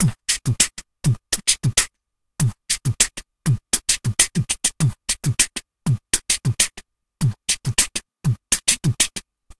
Beat box 100BPM 01 mono
A lofi beatbox percussion loop at 100 BPM.
100BMP, 100-BPM, beatboxing, lofi, loop, mono, rhythm